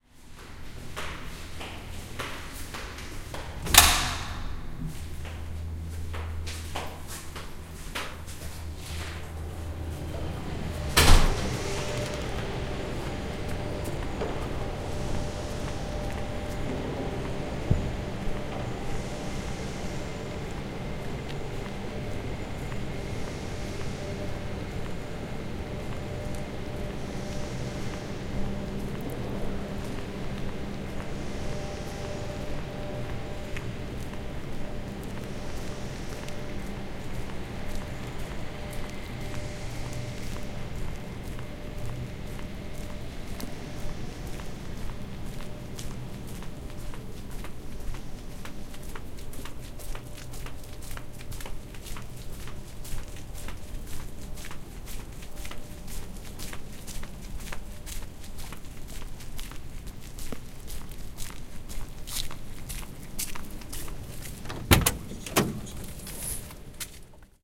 110810-between shower and truck
10.08.2011:eleventh day of ethnographic research about truck drivers culture. 23.00. Oure in Denmark. I am coming back from social building use by workers of the fruitprocessing plant. I was taking a shower. Night ambience of the Oure factory.